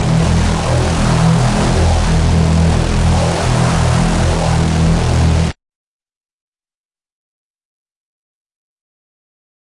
multisampled Reese made with Massive+Cyanphase Vdist+various other stuff
distorted, processed, reese, hard